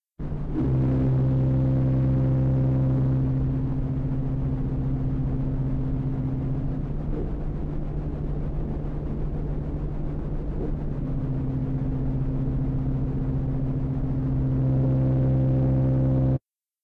pre-recorded organ sounds run through a SABA television at high volume; recorded with peak and processed in Ableton Live
noise, organ, buzz, peak, flutter, distorted